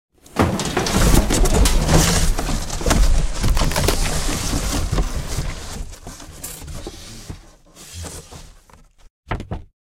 I needed the sound of two people falling into a large pile of empty cardboard boxes. This is a composite of several sounds of cardboard boxes being mangled, spindled and mashed. It was recorded with an SM58 to a PC computer with a Creative Labs Audigy sound card.